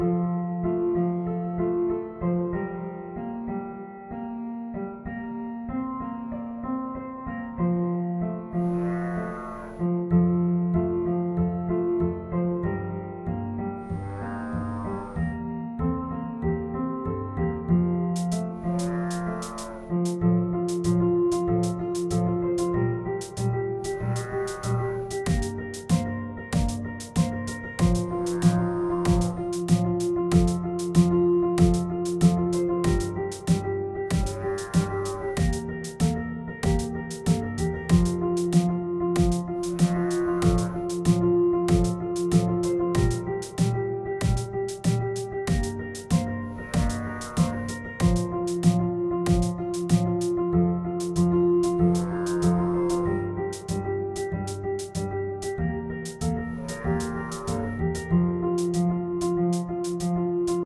There's Moos and drums and piano in this music.
Fianlized version available too.
Cheers!